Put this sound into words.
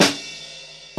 Snare Drum sample with Shure-PG52
Snare Drum sample, recorded with a Shure PG52. Note that some of the samples are time shifted or contains the tail of a cymbal event.
dataset, drums, drumset, sample, Shure-PG52, snare, snare-drum